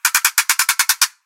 ratchet large01
Large wooden ratchet samples.
orchestral, percussion, rachet, ratchet, special-effect